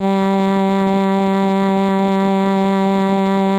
vocal formants pitched under Simplesong a macintosh software and using the princess voice